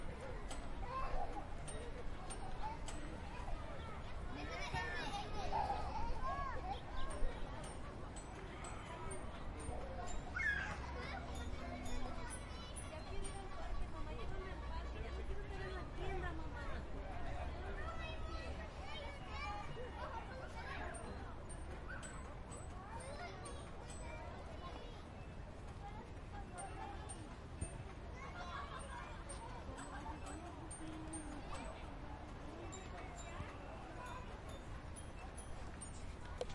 Field recording of kids playing at a park during the day.